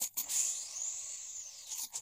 Created from mouth sounds and a laptop cd tray opening and closing, for use as the sound of futuristic paneling opening.
opening, pneumatic, closing, mechanical, panel